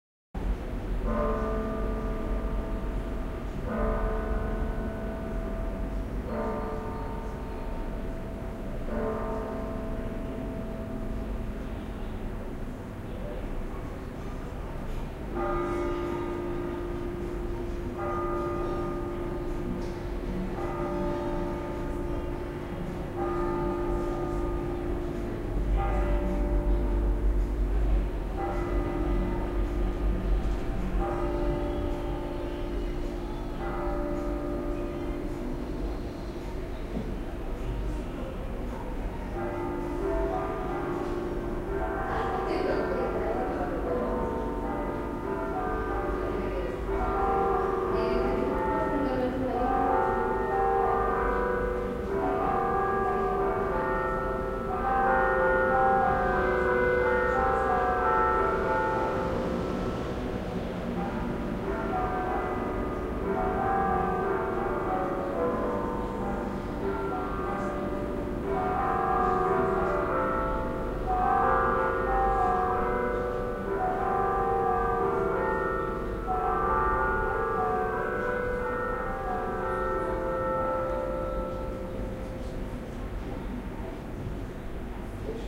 Recording of the 20h bell ring of Sagrada Familia church in Barcelona. Recorded at a bedroom in the 6th floor of a building close to the cathedral at April 25th 2008, using a pair of Sennheiser ME66 microphones in a Tascam DAT recorder, using a XY figure.